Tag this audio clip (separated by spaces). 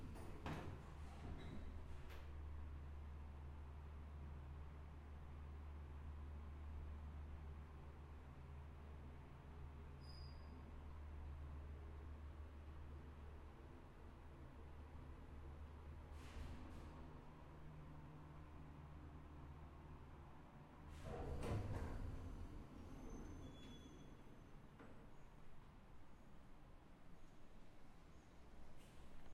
Cargo Lift Ambience Elevator